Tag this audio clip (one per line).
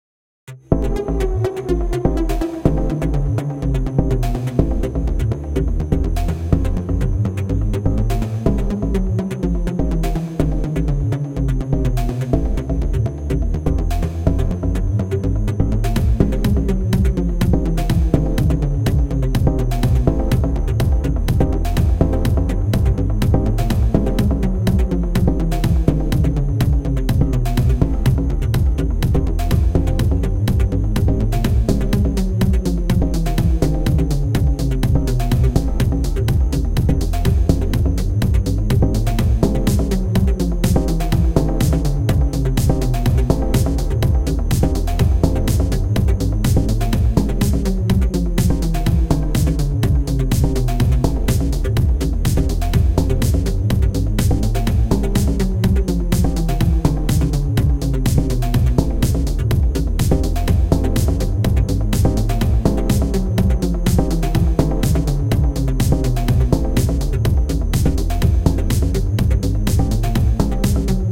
bounce
club
dance
electronic
fx
house
minimal
rave
synth
techno